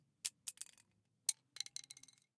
Pen and pencil being dropped on the floor